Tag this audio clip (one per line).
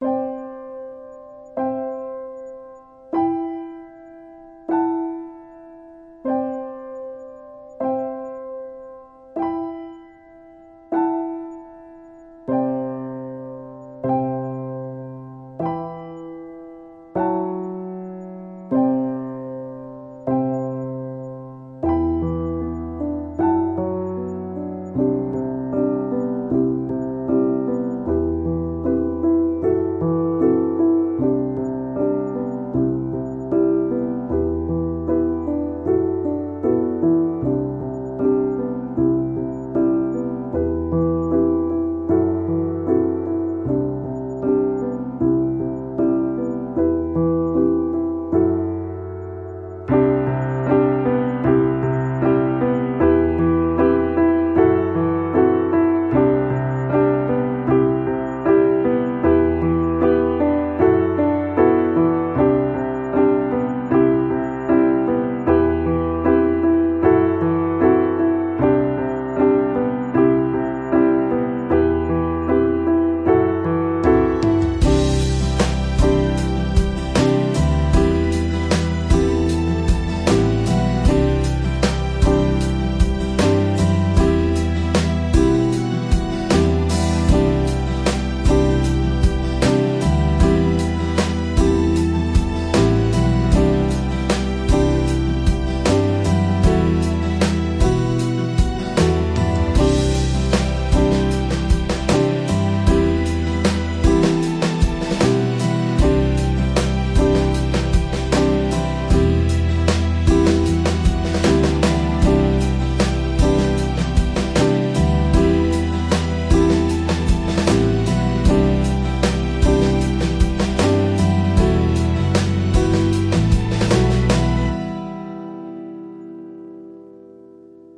music
Piano
test